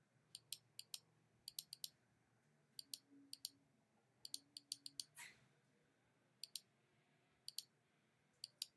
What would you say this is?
click mouse
mouse, rat